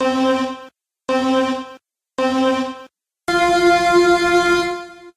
race countdown2
No need to mention me.
Simple race semaphore countdown.
This sound was made with Audacity Software, using a base sound and aplying some filters to it.
car, carreras, cars, coches, contador, countdown, counter, green, light, luces, luz, preparado, race, ready, red, roja, rojo, semaforo, semaphore, threetwoone, tresdosuno, verde